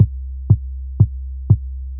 Made of 5 different layered kick beats for the ultimate low, chest hitting experience. Sub Kick Beat Low ultra super mega dance club
groove, club, sub, kick, 1, dance, beat, loop, kickdrum, deep, drum, ultra, clean